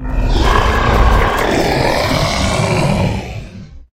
mighty dragon roaring
a dragon roaring sound can be used for horer or fantesy elements
horrer, roar